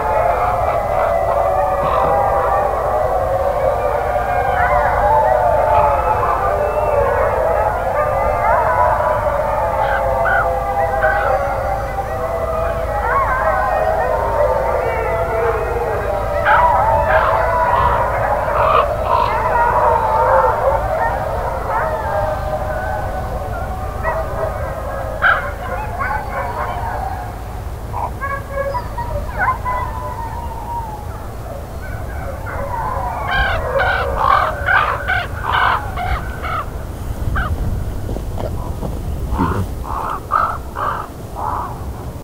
sled dogs distant howling
howling, sled, dogs, distant